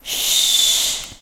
Sound generally used to to quiet people. Recorded with a tape recorder in the 1st floor of the library / CRAI Pompeu Fabra University.
campus-upf, silence